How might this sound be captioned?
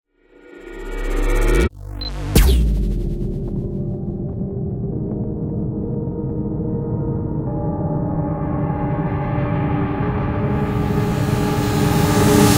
WarpSpeedMediumGm94bpm
The spaceship launches into a mid-length warp speed, accompanied by a synth piece.
science-fiction, spaceship, atmosphere, music, futuristic, warp-speed, synth, medium, sci-fi